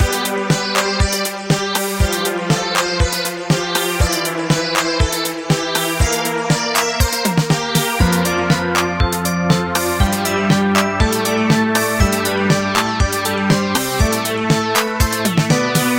Short loops 12 03 2015 4
made in ableton live 9 lite
- vst plugins : Alchemy, Strings, Sonatina Choir 1&2, Organ9p, Microorg - Many are free VST Instruments from vstplanet !
you may also alter/reverse/adjust whatever in any editor
gameloop game music loop games organ sound melody tune synth happy
game, gameloop, games, happy, loop, melody, music, organ, sound, synth, tune